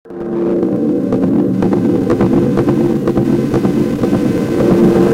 Deadly swinging sword
Super sword swinging, danger, death, fear
sword, danger